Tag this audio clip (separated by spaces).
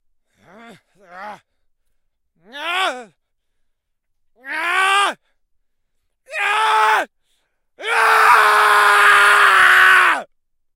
acting anger angrily cross demented frustration furious hate hatred insane irritation lunatic mad madcap male odium resent screaming sore voice